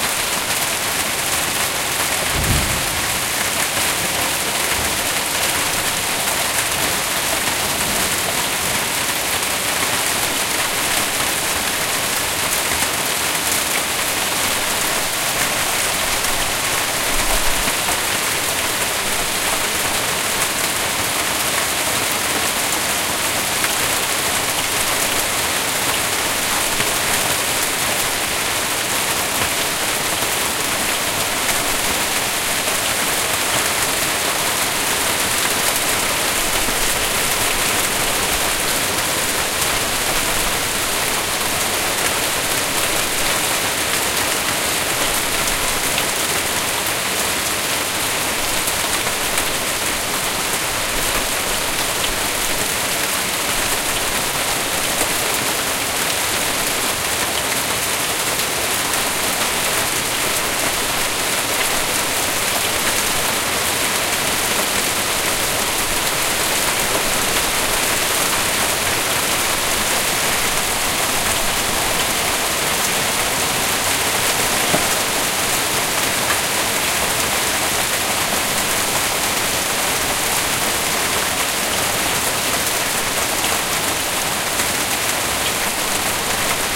hail in turin
Hail from my balcony in Turin (Italy)
hailstorm, torino, hail